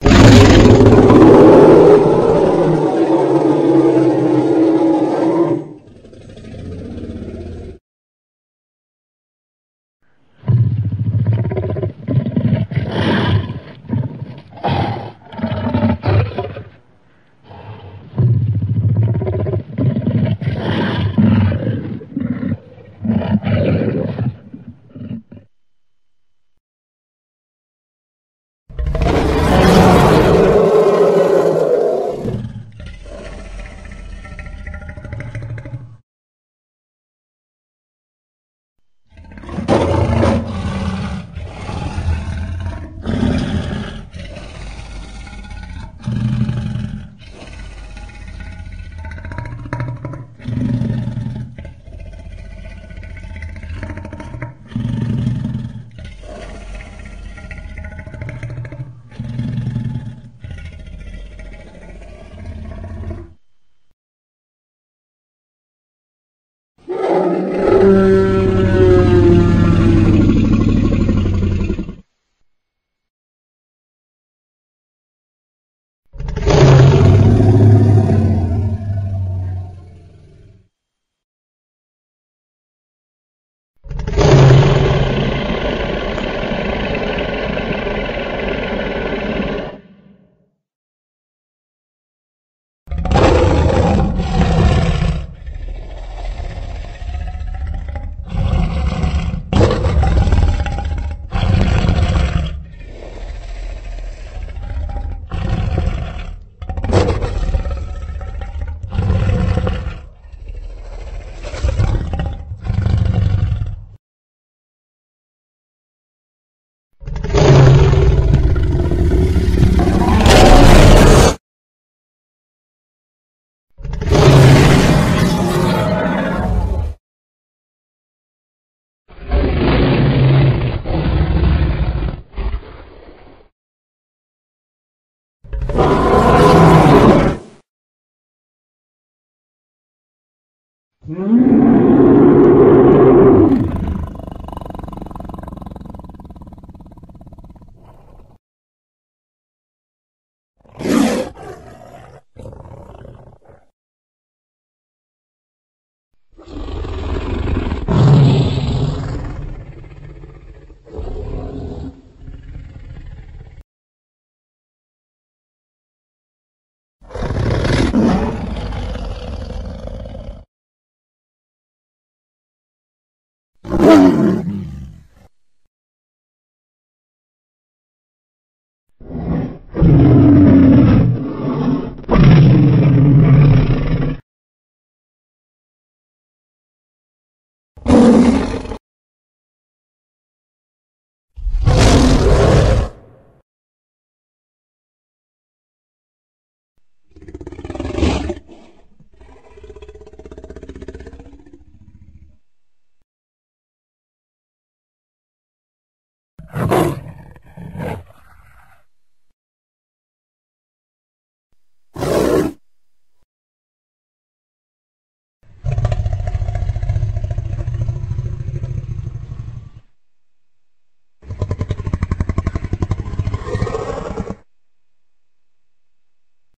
Dinosaur Roars and Growls V2
Animal, Tyrannosaurus, Growl